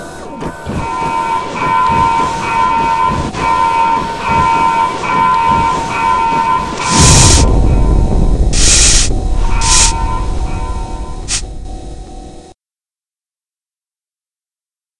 factory explosion steam burst
a quick piece i made using various effects, to give the impression of a factory accident in which a fire/explosion occurs, and steam vents and opened etc.
I'm afraid I threw that together 7 years ago when I would have been 14 years old.
were used). If you recognise any of your work in this - just give me a shout/report it; I've got no problem removing this, as I said; did it a long time ago and forgot I'd even made it.
So just be aware there are risks in using this and you'd probably be better just making a new better one to replace this anyway.
Thanks!
factory, fire, explosion, synth, machine